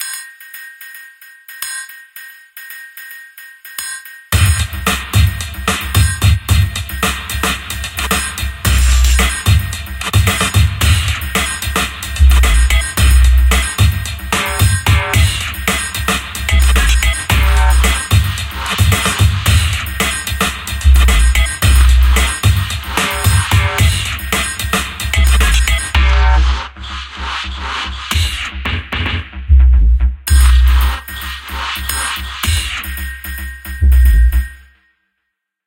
cavatt beat 111 BPM M
A beat to shop up and use in numbers of ways. Loops fine in 111 BPM. Made with my DAW and samples, nothing to original I guess.
break, bass, triangle, beat